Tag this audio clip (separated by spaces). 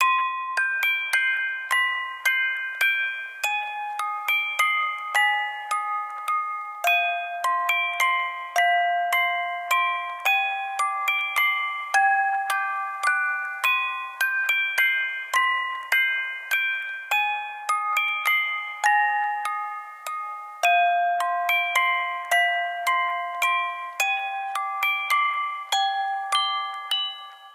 musicbox eerie creepy spooky